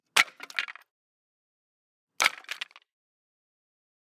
SFX wood fall pile bunch stone floor 01
SFX, wood, fall, pile, bunch, stone floor, drop, falling, hit, impact